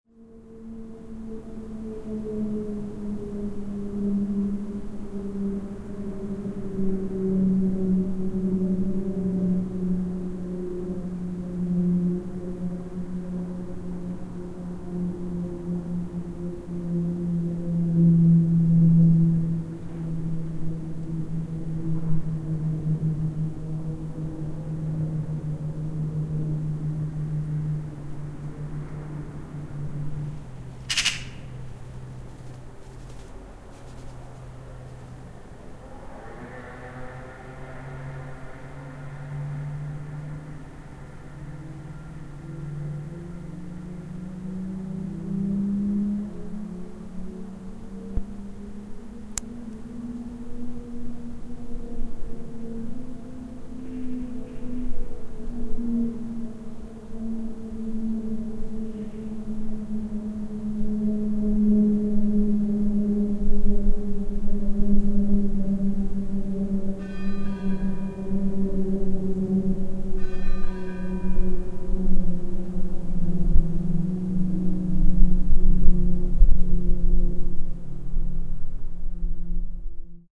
Pilatus PC21 over La Neuveville (CH) by night
Two Swiss Pilatus PC21 planes training over La Neuveville (CH) by night at 9:30 PM. You also can hear a bird and the tower bells. Recorded with a ZOOM H6, XY mic.
singing, planes, training, PC21, bells, switzerland, aircraft, motor, tower, bird, wind, pilatus, night